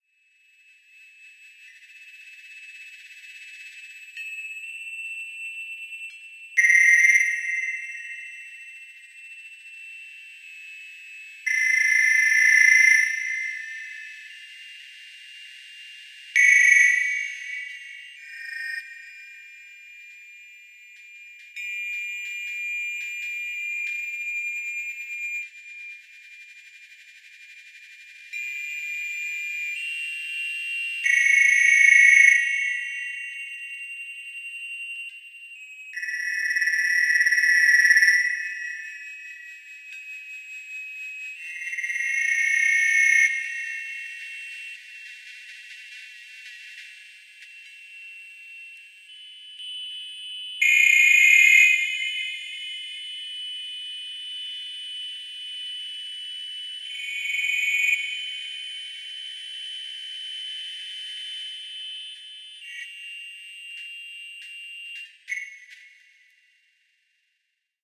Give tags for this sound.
avantgarde
sequence
sound-enigma
electronica
experimental
synthesizer
electronic
Tape-music

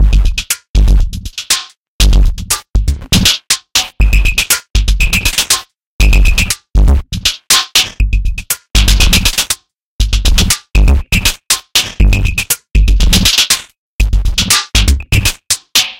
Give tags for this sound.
breakbeat
distorted

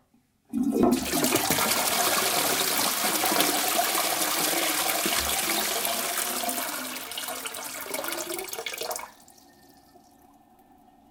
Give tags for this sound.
restroom
flushing
flush
toilet
wc
water

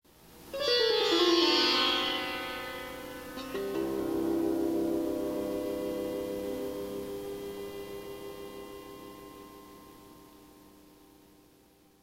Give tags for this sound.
sitar
scale
twinkle

